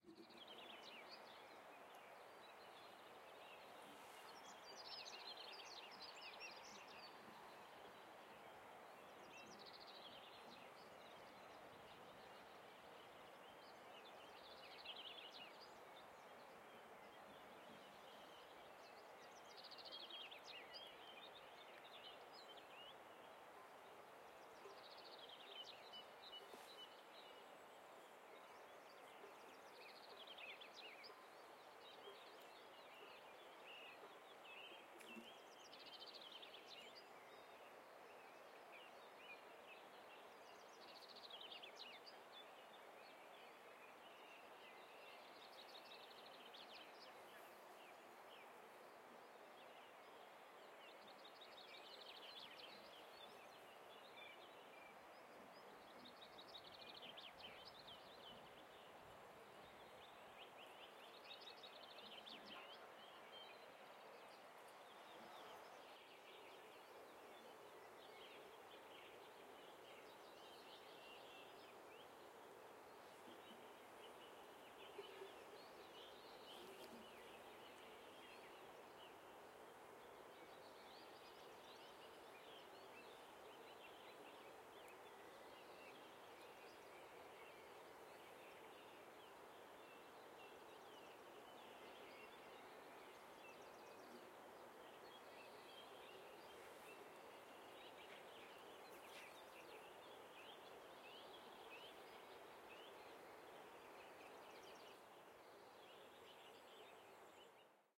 Benasque Ambience
Ambience recorded in summer in Benasque
ambience, benasque, mountain, nature, river, sound, wildtrack